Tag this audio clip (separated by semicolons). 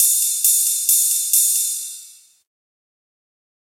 ride; drums; hats; loop; cymbal; percussion